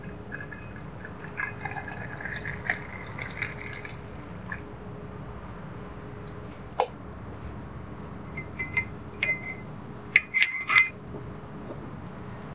coffee serve01
Coffee is served from a coffee pot into a cup, then the cup is placed on a saucer.
Recorded with a Zoom H4n portable recorder. Edited a bit in Audacity to raise the level of the coffee pouring sound and lower the level of the saucer impact sound.
coffee, cup, kitchen, mug, pouring, saucer, serve, serving